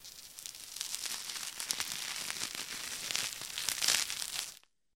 a set of samples created using one household item, in this case, bubblewrap. The samples were then used in a composition for the "bram dare 2"
it beats watching telly.........
bubblewrap, dare2